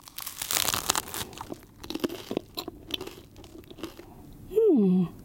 eating a croissant 01
A bite in a fresh baked croissant, chewing and an appriciating mmh...
bite, bread, chewing, crispy, Croissant, crunching, eating, food